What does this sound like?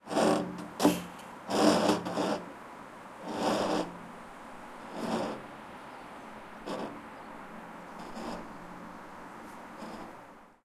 Jalousie hitting and crawling on a open window.
crawling, glass, jalousie, open, window